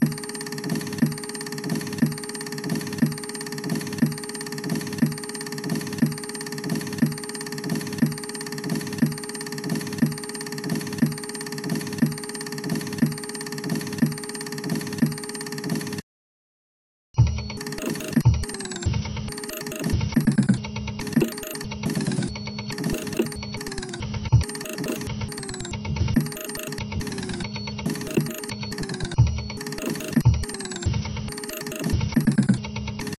cd-dvd printer sound
Broken СD/DVD printer recordered at my office. Here is the sound of internal elements of device and retractable disc slot.
Recording until pause is original sound. After pause is the same sound processed with Gross Beat plugin in FL Studio 12.
Who said that music should be pleasant?
computer, electronic, machinery, office, print, printer, scanner